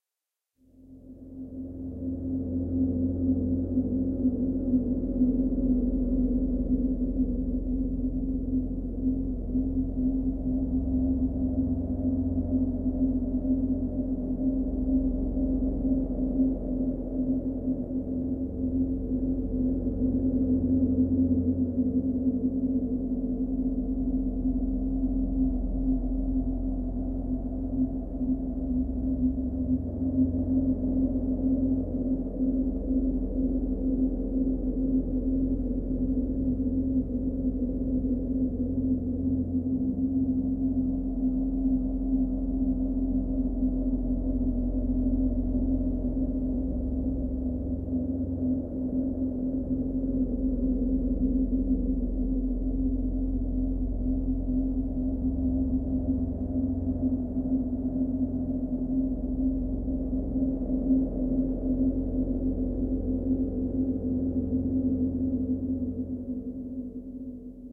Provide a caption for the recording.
cine background7
made with vst instruments
hollywood, space, thrill, ambient, movie, sci-fi, mood, drone, cinematic, background-sound, thiller, deep, music, suspense, pad, dark, atmosphere, trailer, background, spooky, soundscape, ambience, horror, film, drama, scary, dramatic